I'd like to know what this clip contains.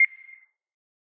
Short beep sound.
Nice for countdowns or clocks.
But it can be used in lots of cases.
c, digital, beeping